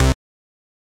Synth Bass 012
A collection of Samples, sampled from the Nord Lead.
bass, lead, nord, synth